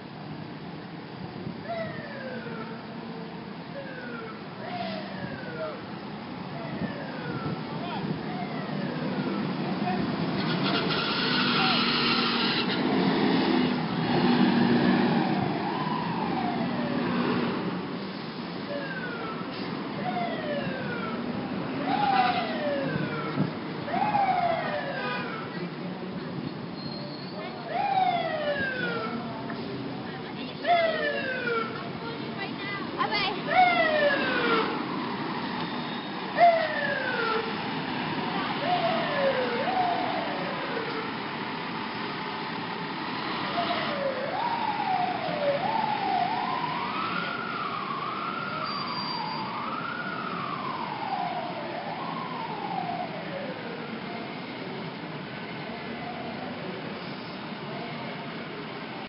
Sounds on a NYC street. Recorded with iPhone 4S internal mic.